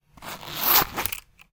OBJZipr Zipper Purse Olympus LS-P4
Opening a purse, sound of a zipper. Recorded with Olympus LS-P4.
field-recording, zipper, purse